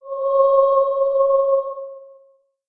This short sample presents another part of recorded voice, edited in NEW Audacity. It's a single note – C#.
single-note, voice, choir, aah, C-sharp